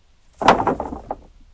Upsetting a large pile of two-by-fours. The result being this wonderful sound.
bang, clatter, fall, planks, wood